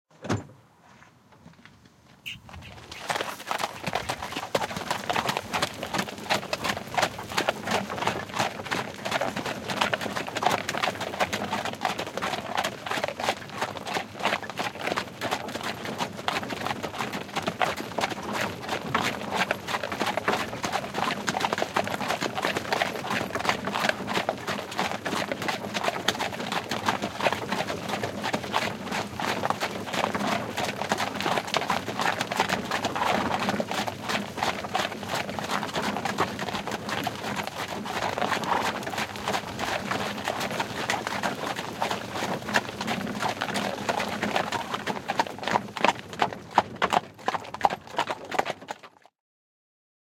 Horsewagon start:steady from driver seat
Horsewagon from 18th century
driving, exterior, horse, wagon